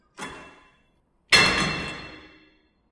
This is the sound generated by a leg extension machine once someone starts, and when someone is done using it.